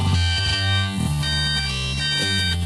Pitched and highly stretched vocal with glitch effect.